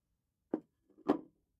set plate down